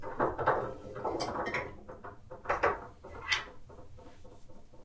Recorded with Zoom H1. Working with pieces of wood blocks. Edited with reverb.
ambient, indoor, rythmic, tools, wood, working